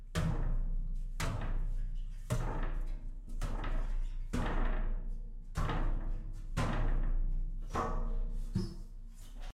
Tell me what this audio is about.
bajando una escalera de metal
bajando unas escaleras de metal
walking, stairs